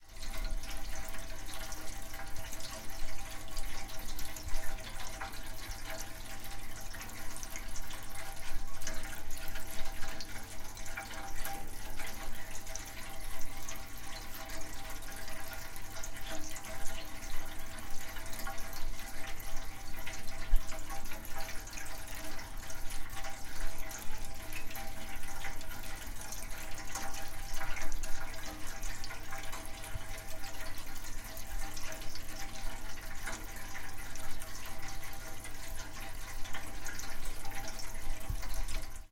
Sound of our house heating/radiator, with water pumping through the pipes. No contact.